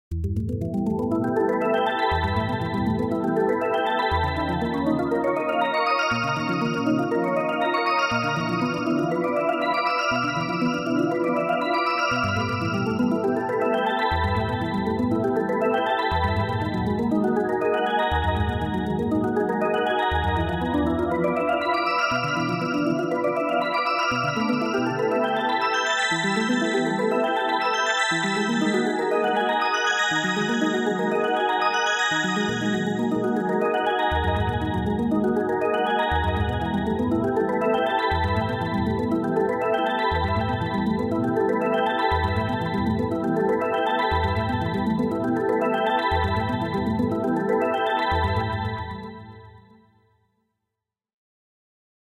Like an old theatre organ, opening the show.
Made with Nlog PolySynth and B-step sequencer, recorded with Audio HiJack, edited with WavePad, all on a Mac Pro.